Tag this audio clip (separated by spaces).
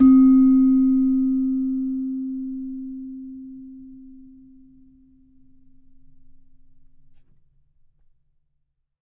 celeste samples